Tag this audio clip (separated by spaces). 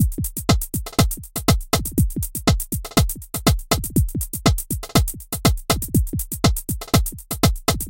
loop drum-and-bass drum-loop dnb drum break jungle breakbeat drums